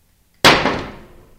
An object impacted against a glass, but the audio clip doesn't sound like it hit against glass.
hit, impact, slam